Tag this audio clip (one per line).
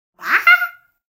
cartoon
halloween
Scary
scream
voice
wow